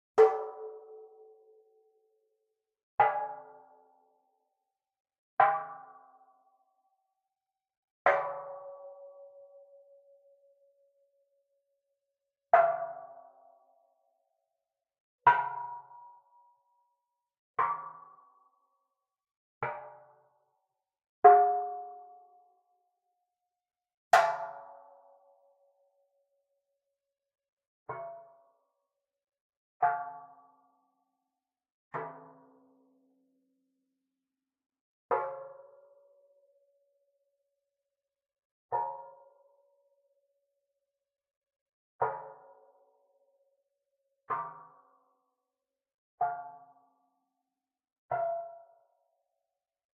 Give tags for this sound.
flickr; hit; metal; percussion; timpani

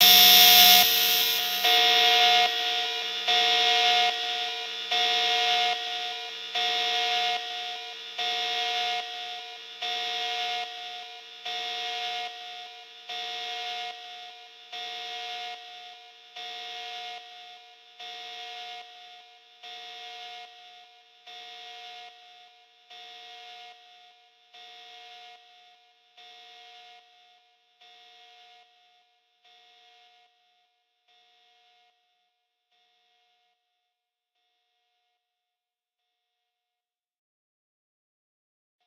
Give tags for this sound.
acid; alesis; base; bass; beat; beats; chords; electro; electronic; glitch; idm; micron; music; small; techno; thumb

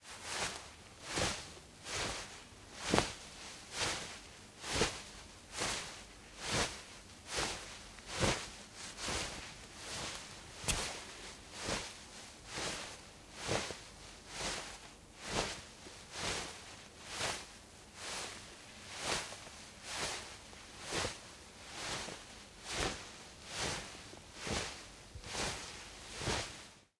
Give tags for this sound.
Rustle; Foley; Movement; Cloth

